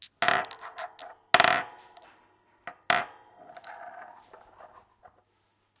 essen mysounds mirfat
Essen, germany, mysound, object